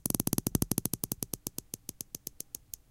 AKG mic. Sony MD. closing a zip-tie slowly.
clicky, field, glitch, md, noise, recorder, recording, wrap, zippy, zip-tie